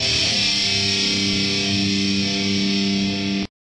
THESE LOOPS ARE ALSO 140 BPM BUT THERE ON A MORE OF A SHUFFLE TYPE DOUBLE BASS TYPE BEAT OR WHAT EVER YOU DECIDE THERE IS TWO LOOP 1 A'S THATS BECAUSE I RECORDED TWO FOR THE EFFECT. YOU MAY NEED TO SHAVE THE QUIET PARTS AT THE BEGINNING AND END TO FIT THE LOOP FOR CONSTANT PLAY AND I FIXED THE BEAT AT 140 PRIME BPM HAVE FUN PEACE THE REV.
groove, guitar, hardcore, heavy, loops, metal, rock, rythem, rythum, thrash